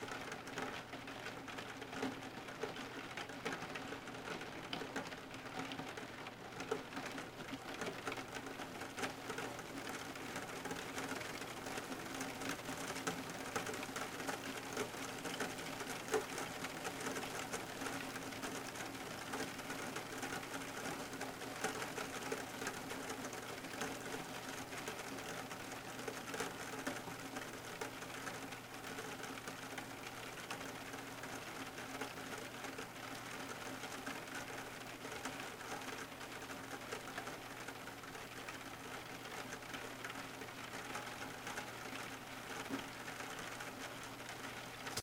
A 45 second recording of light rain hitting the skylight of my studio. Done as a thank you for the sounds others have given away here.